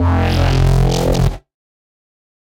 electronic synth made with Massive by Voodoom Production